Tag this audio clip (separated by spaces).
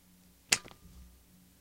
Gun Magazine Pistol Removal Smith-Wesson